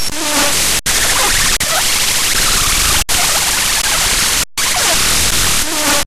Another nice hard harsh digital noise processed in the same way as Bit 1 was. This file is downpitched so you can hear better the data flow.